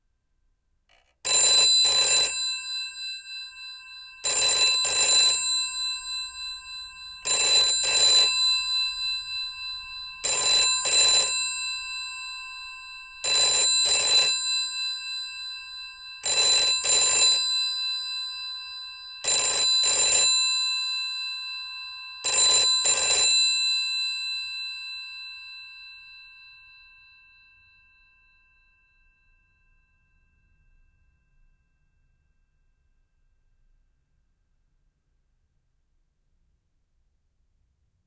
1ft dry 8 rings
60s, 70s, 746, 80s, analogue, GPO, Landline, office, phone, post, retro, telephone